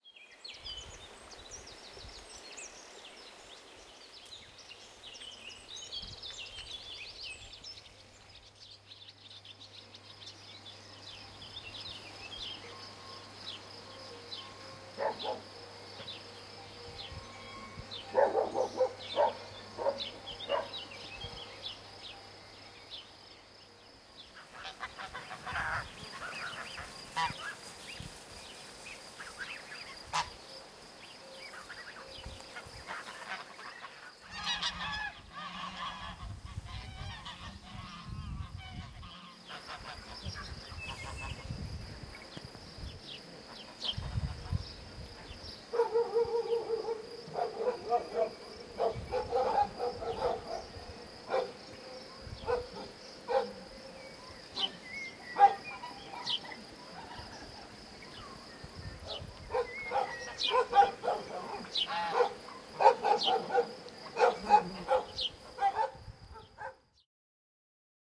Farm Sounds
Field Recording with XY stereo mobile recorder in a farm in Vovousa, Greece. Cowbells, birds, hens, dogs and other animals can be heard.
birds, cowbell, cows, dogs, farm, farms, hen, hens, nature